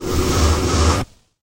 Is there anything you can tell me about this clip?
fireball fire2
game, video, sounds, games